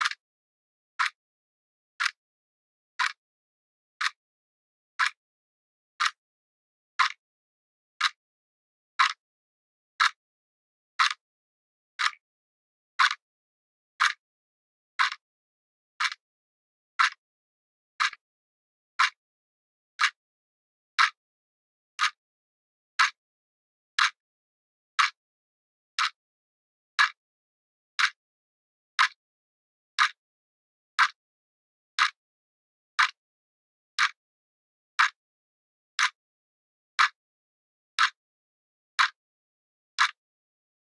clock ticking - atmo
Some Fieldrecordings i did during my holidays in sweden
Its already edited. You only have to cut the samples on your own.
For professional Sounddesign/Foley just hit me up.
clockwork, narrow, ambience, ticking, quiet, ticks, time, tac, wall-clock, clock, field-recording, hour, little, tick-tock, tic